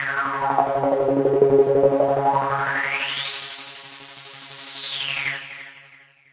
18 ca dnb layers
These are 175 bpm synth layers maybe background music they will fit nice in a drum and bass track or as leads etc
atmosphere, bass, beat, club, dance, drum, effect, electro, electronic, fx, house, layers, loop, music, rave, sound, synth, techno, trance